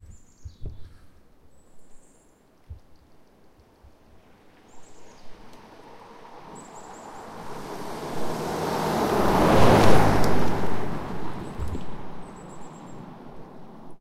Passing Car Snow Bridge
A car passing by on a snowy bridge from right to left.